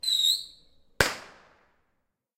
Firecracker Rocket Shoot Blast
It's Diwali currently (An Indian Festival of Lights), everyone's blowing firecrackers and other fun stuff.
Diwali, Festival, Fire-cracker